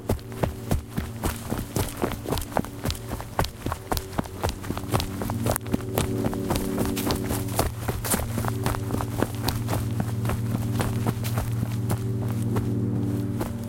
running in the woods
action, agile, forest, outside, running, woods